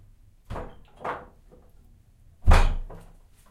Old heavy door
Door in an old russian village house - kazachya hata.
door, Russia